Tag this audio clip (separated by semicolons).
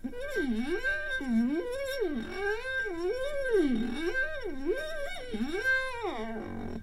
bowl; glass; crystal